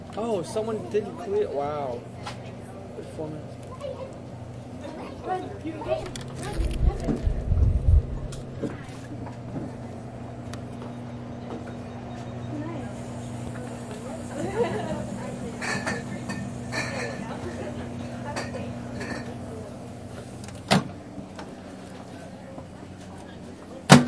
Stereo, H4N
In a bustling bistro, young man talking. Approximately 30 people in the room.